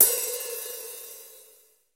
Rick DRUM CO
acoustic, drum, rick, stereo